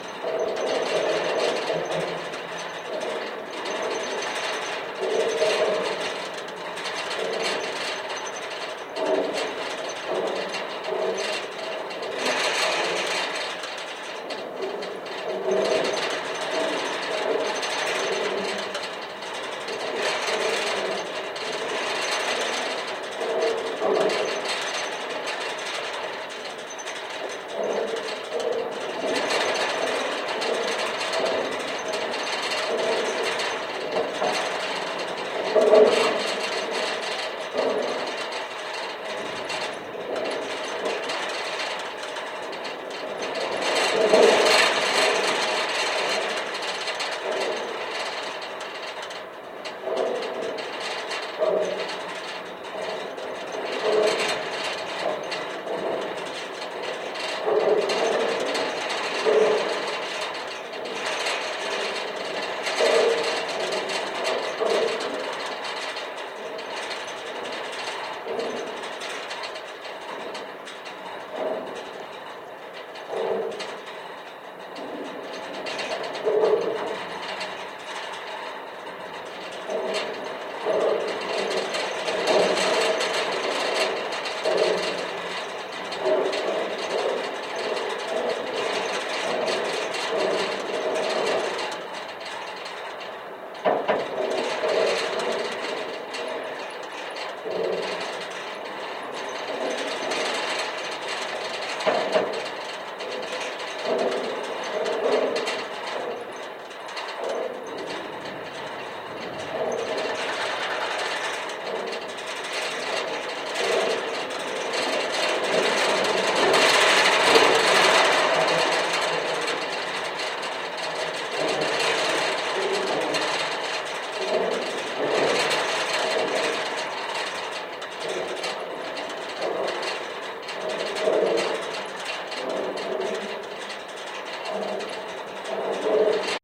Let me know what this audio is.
GGB 0406 ChainLink SEW N
Contact mic recording of an upright guard-rail post with attached chain link fence on the Golden Gate Bridge in San Francisco, CA, USA near the southeast pedestrian approach, next to the last concrete pylon. Recorded August 20, 2020 using a Tascam DR-100 Mk3 recorder with Schertler DYN-E-SET wired mic attached to the cable with putty. Normalized after session.
chain-link, contact-mic, contact-microphone, DR-100-Mk3, DYN-E-SET, metal, Schertler, Tascam, wikiGong